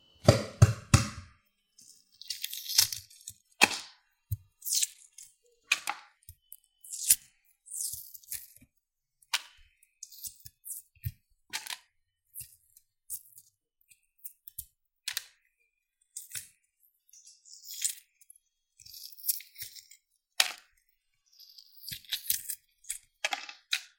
the sound of getting rid of the egg shell